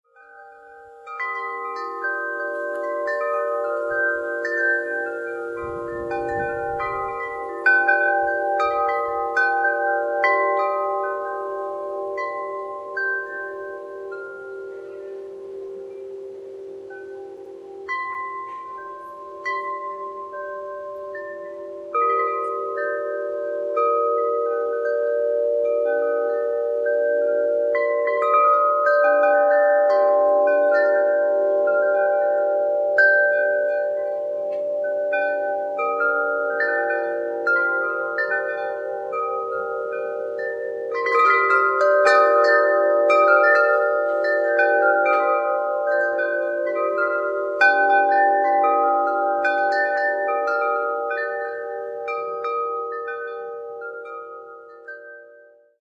Our Chimes
Recorded with Sony digital cam. Noise filtered using Adobe Audition. Chimes died of wounds suffered in hurricane winds and this is in their memory.